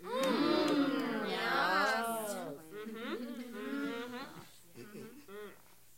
Murmur 3 approval
Small crowd murmuring with approval